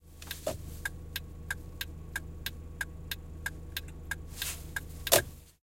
12 Warning lights
car, CZ, Czech, lights, Panska, warning